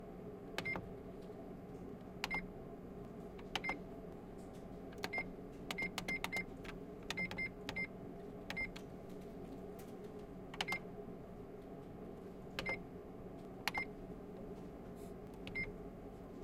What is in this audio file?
printerFax Beeps
Office fax-printer beeps. HP LaserJet 1536dnf MFP. Loud background noise is ventilation.